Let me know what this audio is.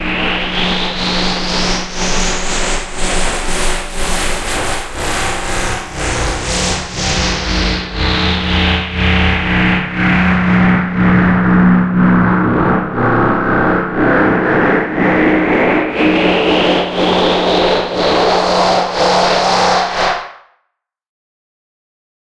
Wobbling Noises
A wobbly sci-fi soundscape. I hope you like it!
If you want, you can always buy me a coffee. Thanks!